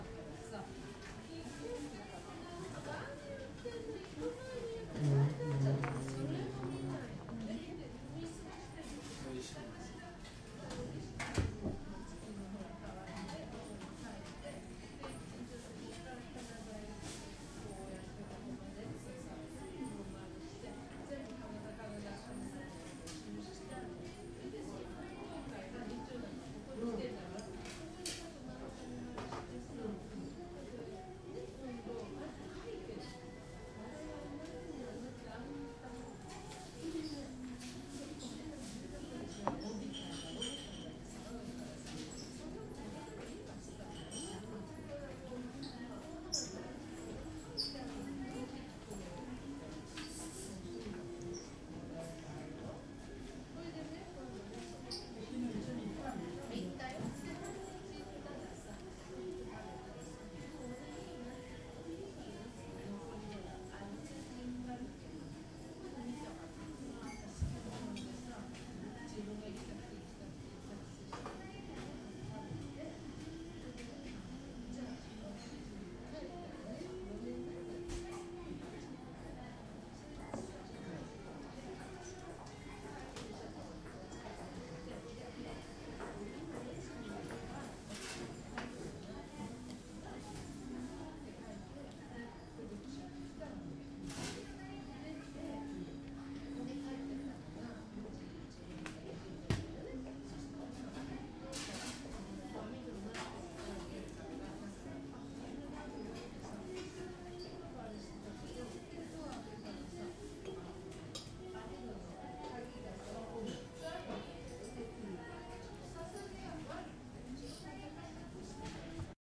Coffee shop in Tokyo. Lots of background noise and conversation. Staff is cleaning. Espresso drinks are being made. This recording was made with a stereo pair of mics placed at a coincidental 120 degree angle. I rolled off the low end at 28Hz to remove the sound of coffee cups being set on the counter where the mics were placed.
ambience, background, cafe, coffe-shop, fast-food, field-recording, japan, late-dinner, restaurants, tokyo